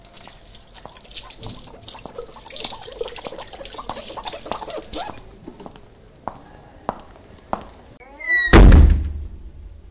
HOW I DID IT?
Superimposing 4 tracks (recorded) together:
First track (6 s)
Record of the noise of a wet finger against a mirror
apply effects : fade in, normalise
pan : 50 % left
Second track (6 s)
Record of water falling from a tap
apply effects : normalise
pan: 50 % left
Third track (8 s)
Record of footsteps (woman)
apply effects : fade in, reverberation
pan : 50 % right
Fourth track (1,5 s)
Record of a door slaming
apply effects : normalise
pan : 50 % right
HOW CAN I DESCRIBE IT? (French)----------------------
Typologie :
V'
Morphologie :
Masse: son « cannelé », il y a assemblage de 4 sons toniques et complexes, à hauteurs différentes
Timbre harmonique: brillant par moments mais globalement décousu
Grain: lisse
Allure: pas de vibrato, bruits réalistes
Dynamique : Attaque douce, stagnation puis chute violente
Profil mélodique: Variations serpentines, sauf à la fin pour la transition brutale avec la 4e piste
Profil de masse : Site, il y a 4 hauteurs de son différentes